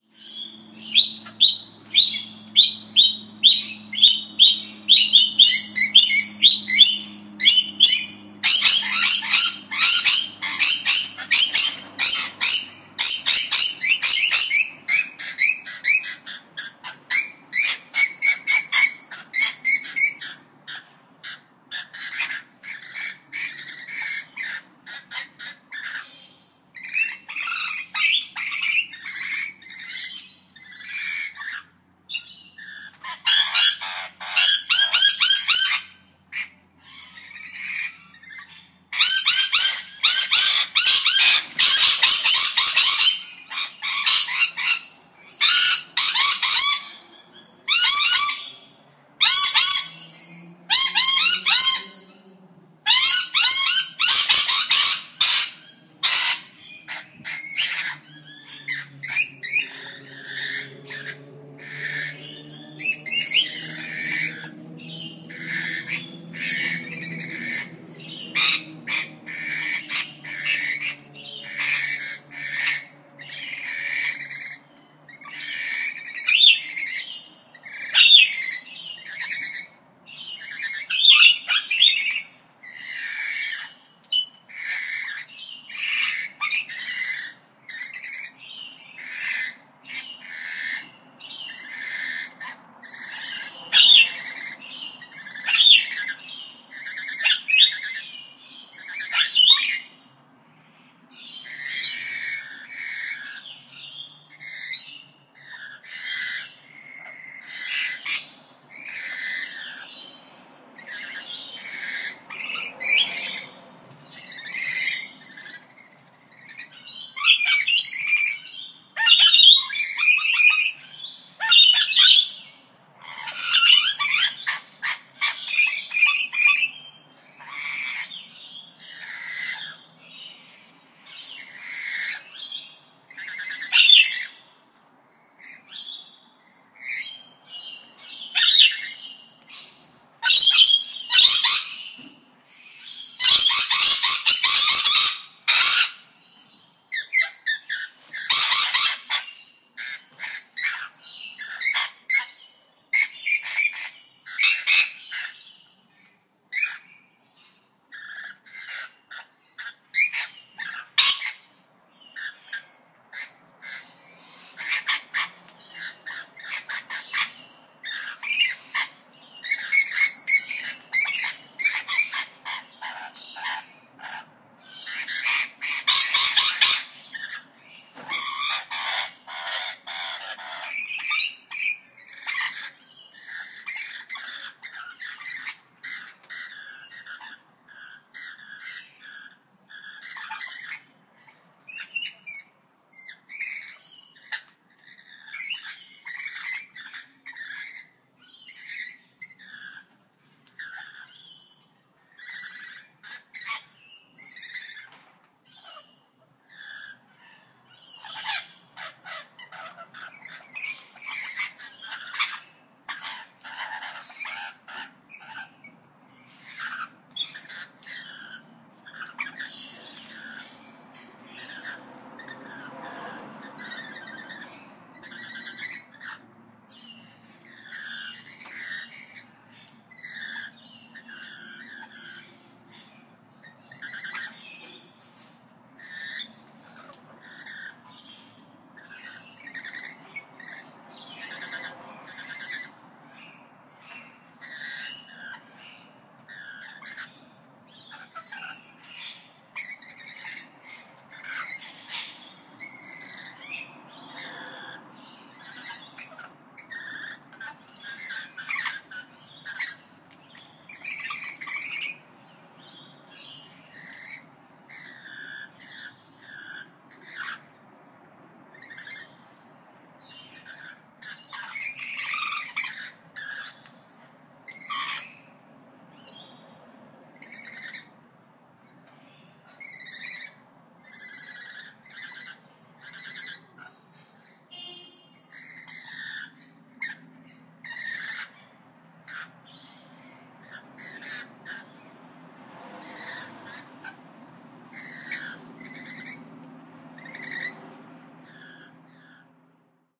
Feeding Frenzy
A couple of Rainbow Lorikeet Parrots chirping happily over food (black sunflower seeds). Neutral Bay, Sydney, New South Wales, Australia, 17/04/2017, 16:57.
Australian, Seeds, Chirping, Lorikeet, Frenzy, Chirp, Parrot, Seed, Sunflower-Seeds, Lorikeets, Winged, Tweet, Parrots, Eating, Rainbow, Birds, Bird, Tweeting, Food, Rainbow-Lorikeet, Australia, Wings